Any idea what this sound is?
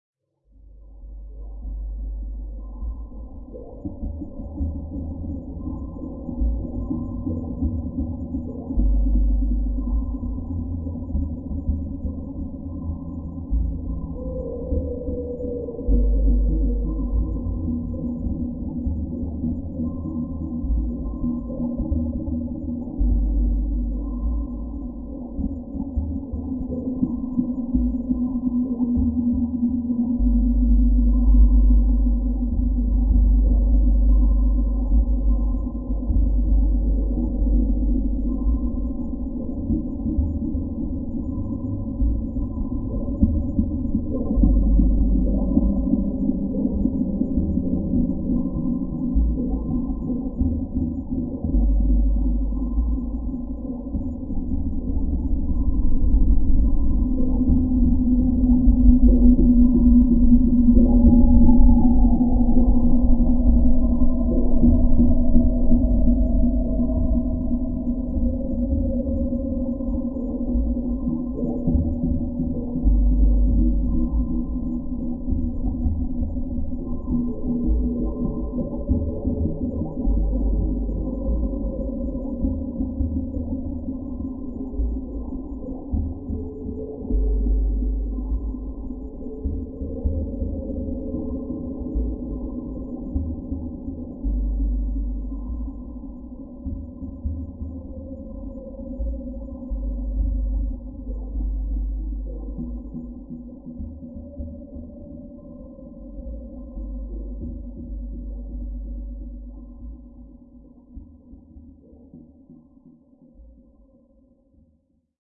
ambient underwater soundscape 2